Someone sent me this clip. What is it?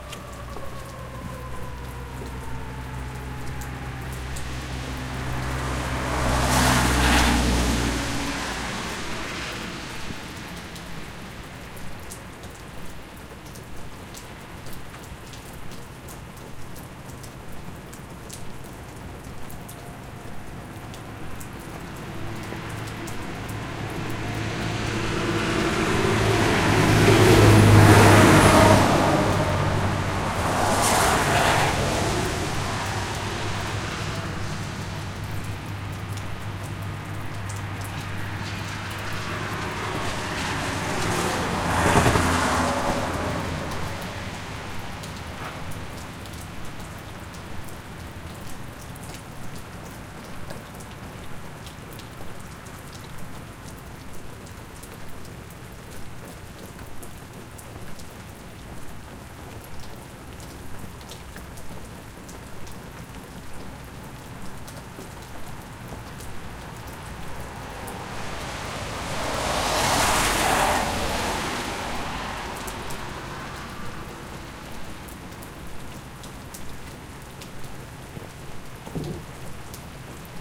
Nature - Rain storm roadside ambience
Roadside ambience in a light rain storm with vehicles occasionally passing by.
ambience, rain, storm, traffic